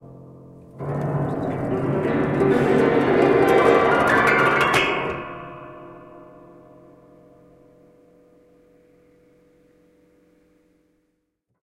A whole bunch of broken piano sounds recorded with Zoom H4n